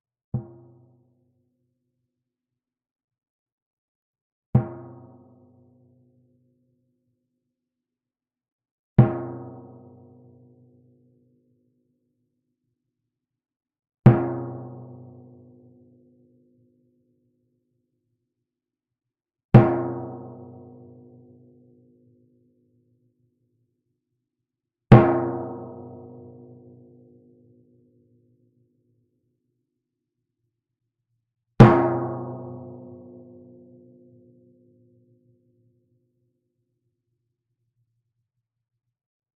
timpano, 64 cm diameter, tuned approximately to B.
played with a yarn mallet, directly in the center of the drum head.
percussion, drums, hit, flickr, drum, timpani